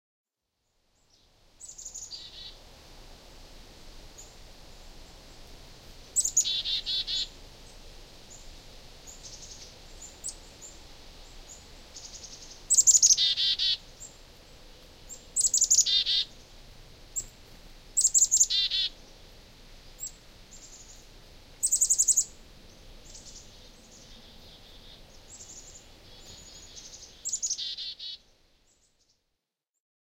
Black-capped chickadee - Mesange a tete noire
A 30s sound clip of chickadees calling one another (or so it seems). You can hear the wing flapping of a bird nearby, as it approached me. Apparently, black-capped chickadees produce one of the most complex calls of the animal world! In fact, it is believed that they produce a warning call, then another call to identify themselves. For more info, google these keywords together : "chickadee complex call". Enjoy!
flapping, chickadee, wilderness, high, binaural, kwack, pitch, woods, small, chirp, forest, canada, bird, ontario, wind, minidisc, wild, flap